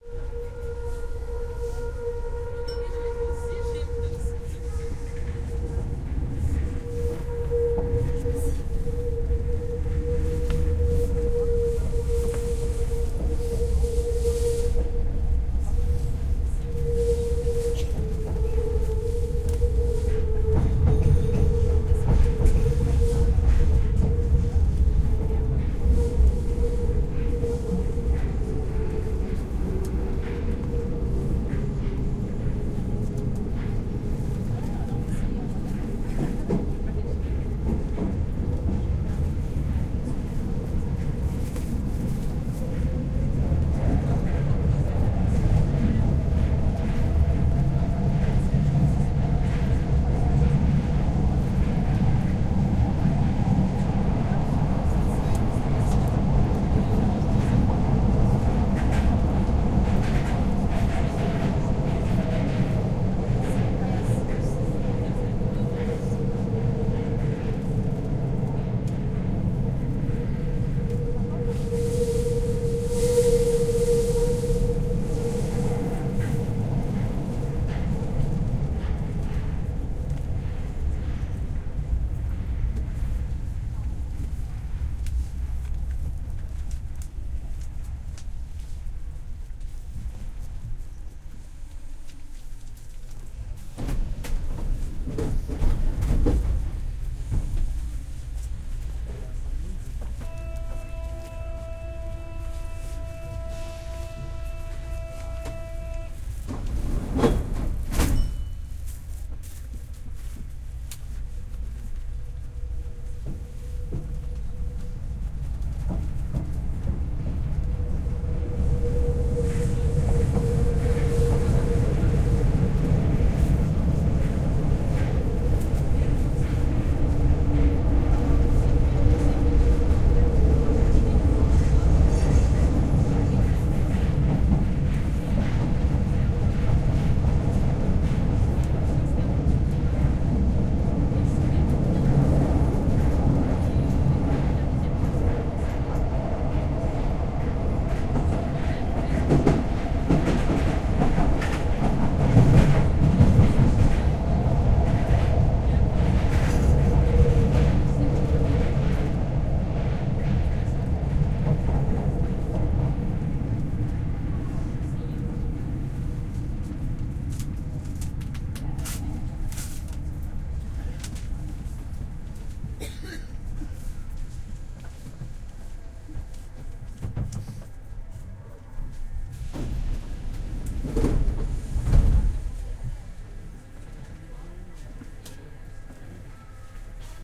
Inside the "metro" (subway) in Paris
metro, paris, subway, tube